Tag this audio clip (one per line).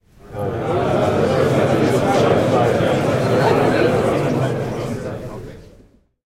mumbling
mass
people
meeting
voices
voice
mumble
talk
talking
crowd